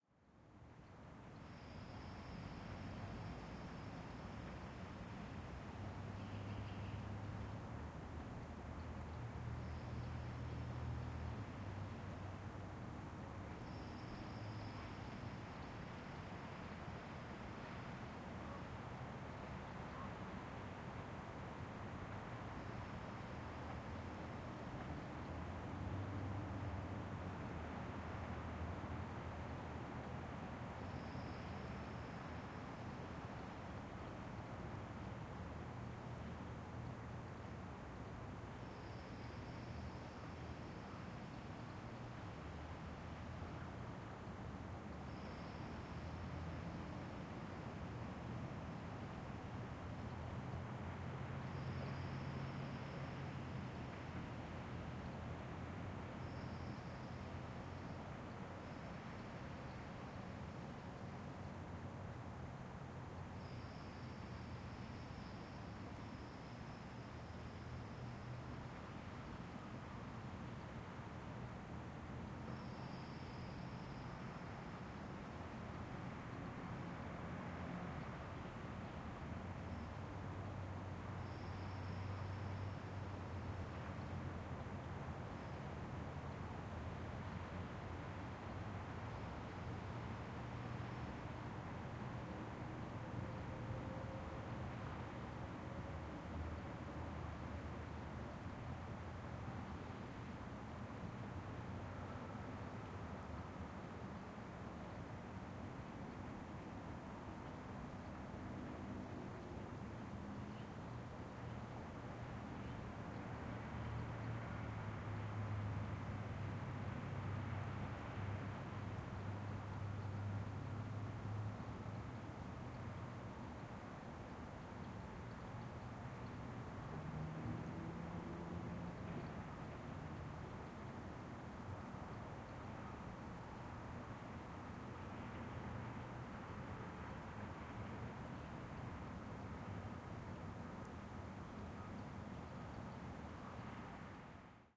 A warmish wet winter day, snow melting. Recorded on an H2N zoom recorder, M/S raw setting.